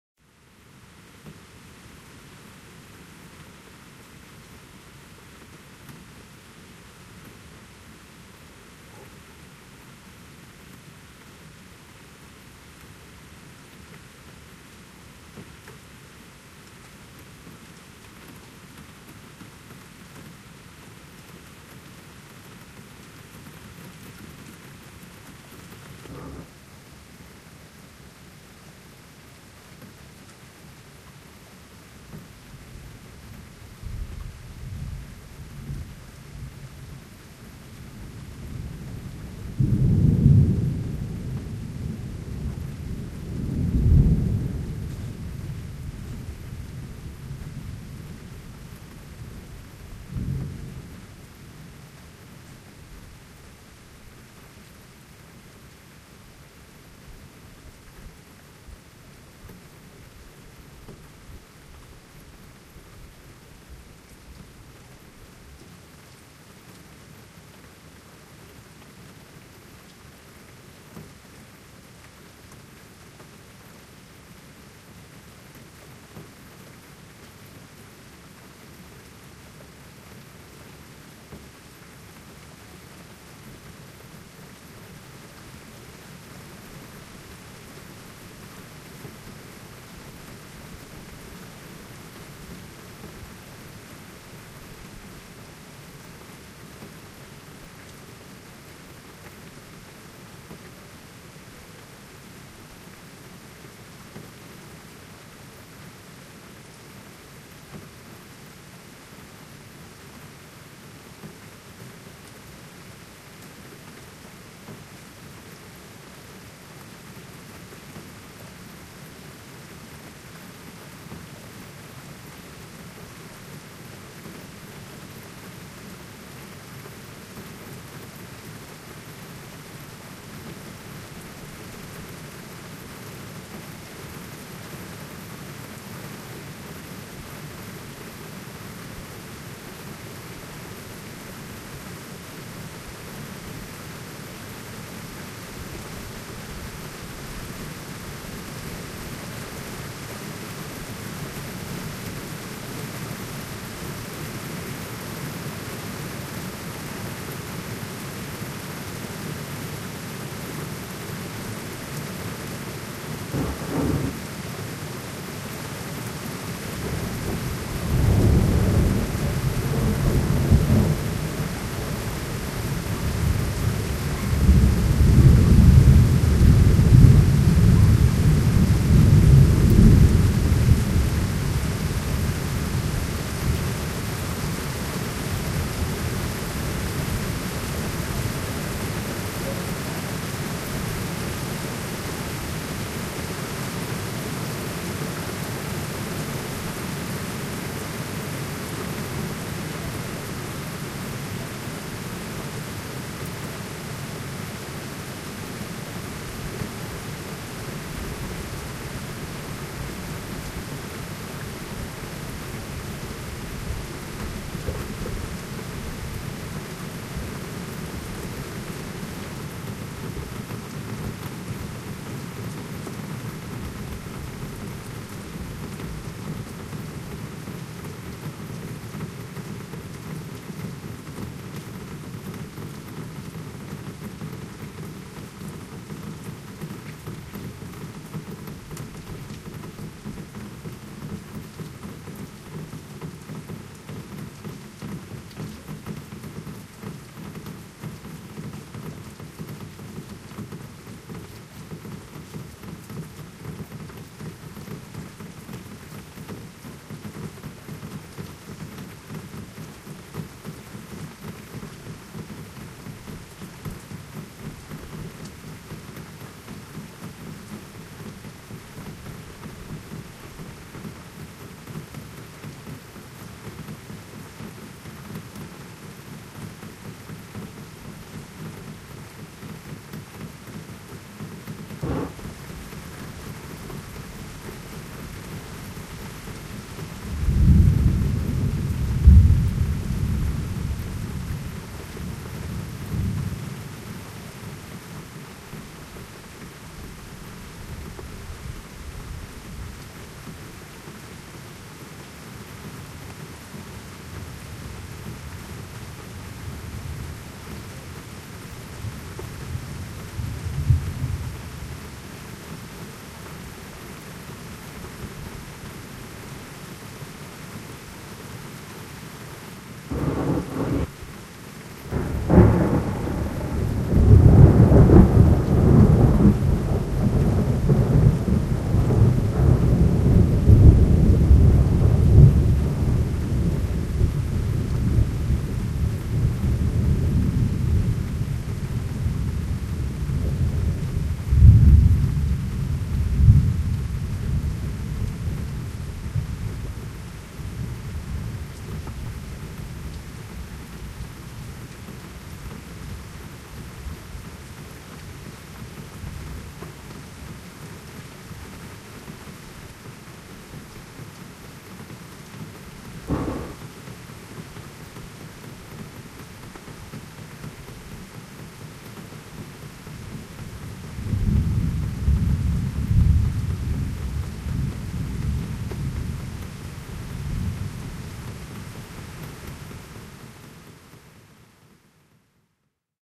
july 1st 2009 at 03.20, moderate distant thunderstorm with heavy rain (part 1)
Recorded at 03:20 hours on wednesday july 1st 2009 in Crosby, north of Liverpool, united kingdom. This recording is of the first part of a thunderstorm that was quite distant, but quite loud. When it began the thunder claps were few and far between. But by the time it went away it had developed into a moderate thunderstorm with more frequent Thunder. This recording begins after the rain starts and the storm is at it's closest. There are some very loud thunderclaps and the lightning sferics is heard on a mistuned radio as a short crackling sound as the lightning flashes.Then the rain calms and the storm recedes.Recorded with the Olympus DS50 digital recorder and a Panasonic Binaural Headset Microphone placed on the outside windowsill of my bedroom window. edited with Wavepad sound editor and equalized in goldwave.
binaural, crosby, drip, lightning, night, rain, sferics, summer, thunder, thunderstorm, weather